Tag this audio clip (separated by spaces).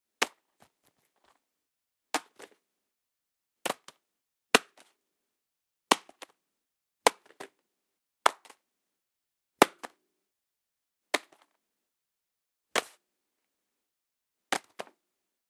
drop fall hit leather wallet